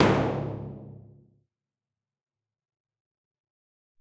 A clean HQ Timpani with nothing special. Not tuned. Have fun!!
No. 22
drums, timp, orchestra, percussion, percs, stereo, pauke, timpani, one-shot, dry, hit, percussive, orchestral, acoustic, drum, HQ